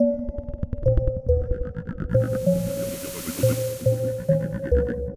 Jazz Voktebof Bells
remix, hmmm, loops, whatever, bells, clash, things, drums, retro